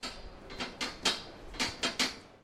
sound 11 - mesh strainer floor
Sound of a foot steping on a mesh strainer.
Taken with a Zoom H recorder, near the metalic mesh.
Taken at the entrance to the cafeteria (upper floor).
floor, footsteps, metalic, strainer, UPF-CS14